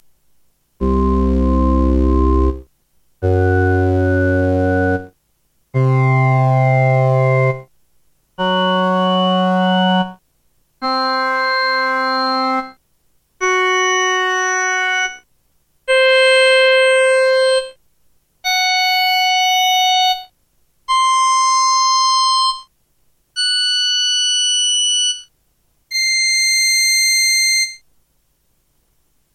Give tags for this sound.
Keyboard SK-86 Piano Vintage Vermona Organ Czechkeys

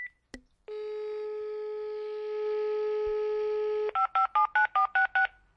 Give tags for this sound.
January2013 Germany SonicSnaps

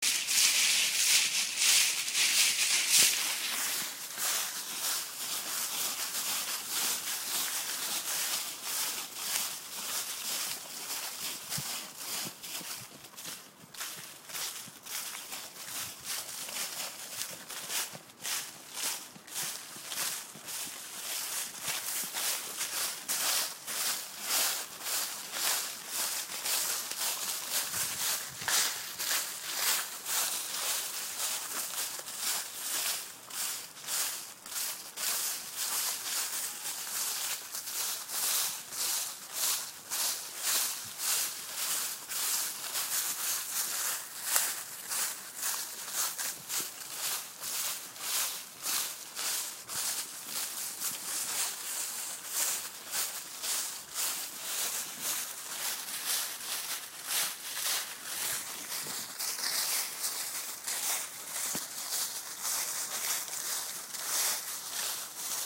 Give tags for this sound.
nature footsteps forest

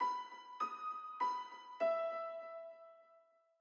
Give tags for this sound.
Music-Based-on-Final-Fantasy Lead Sample Piano

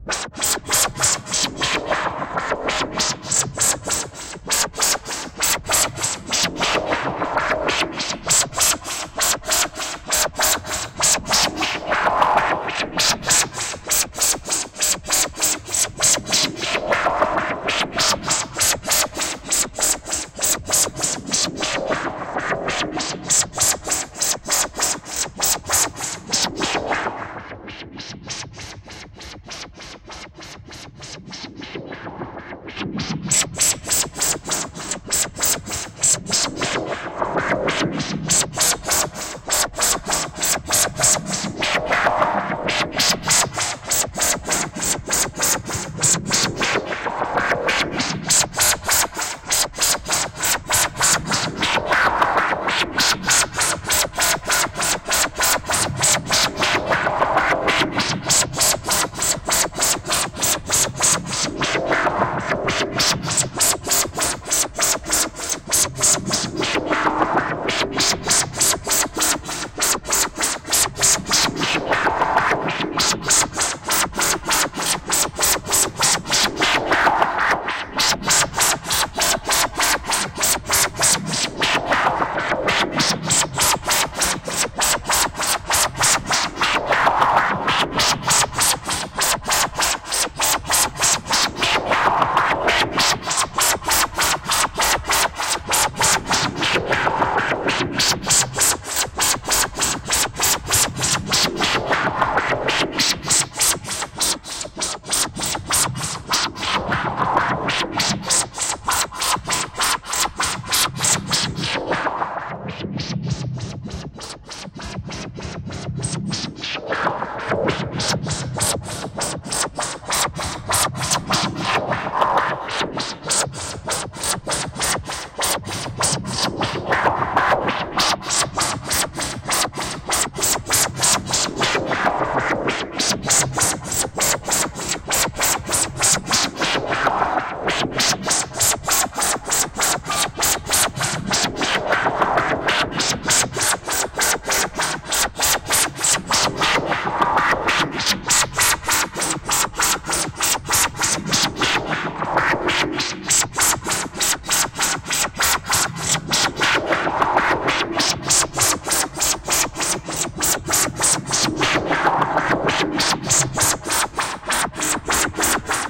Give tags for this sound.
delay effect hammer ipno pneumatic stereo trance wah

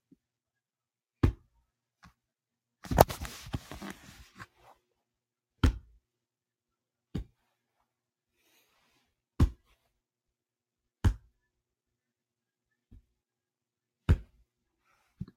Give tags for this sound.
boom effect falling floor hitting mat sound thud

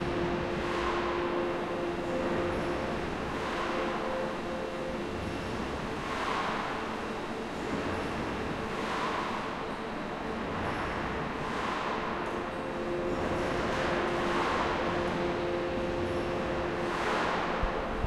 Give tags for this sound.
field machines Wroclaw Poland Factory aw Wroc

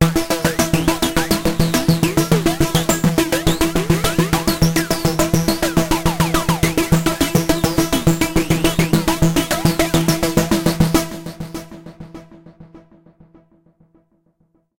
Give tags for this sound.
130bpm arpeggio electronic loop multi-sample synth waldorf

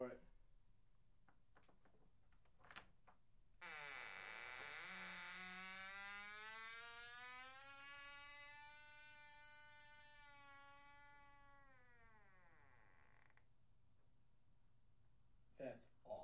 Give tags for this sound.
horror-effects shake horror

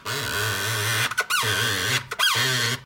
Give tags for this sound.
door groan grunt screeching

